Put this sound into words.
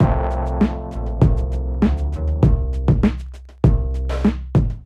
NYHH loop
ny hip hop loop